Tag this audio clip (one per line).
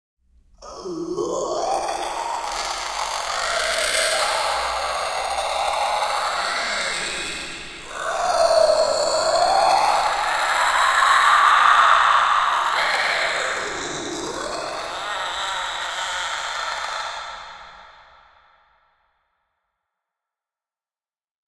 Horror
Dying
Cries
Monster
Creature
Growl
Thriller
Alien